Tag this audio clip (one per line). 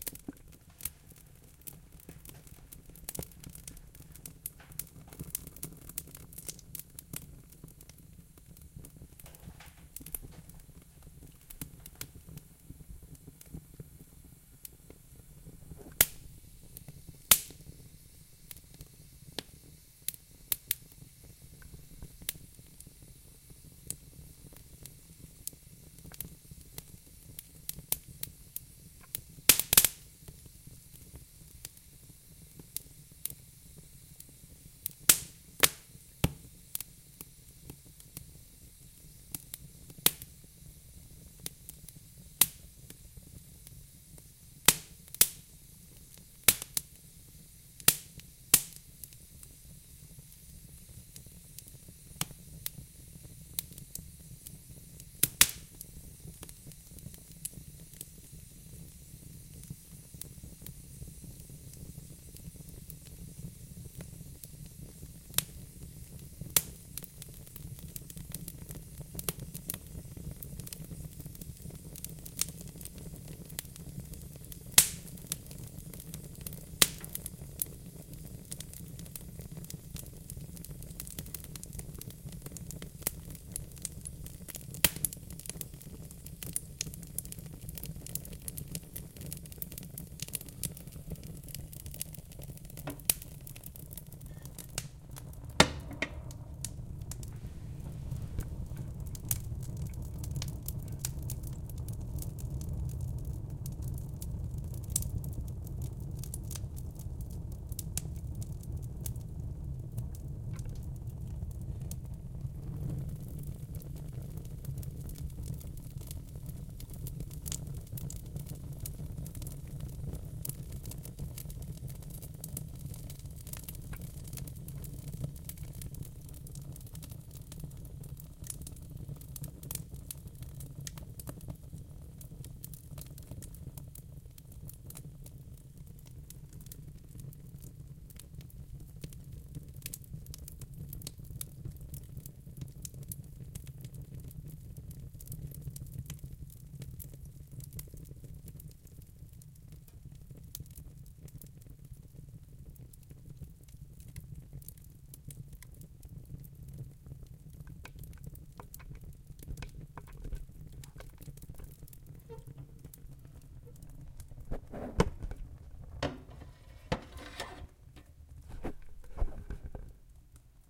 fire
crack
fireplace